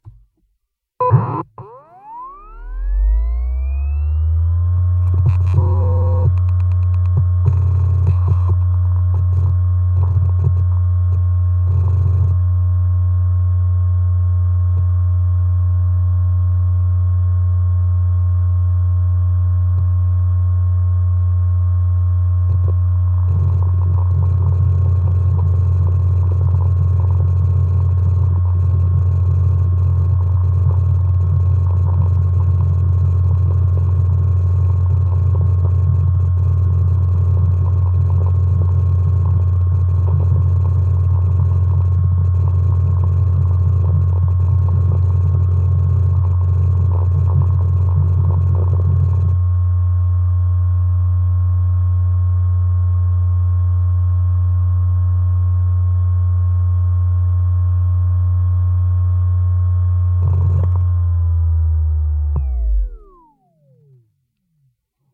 Contact recording of a Seagate 1.5TB FreeAgent external USB hard drive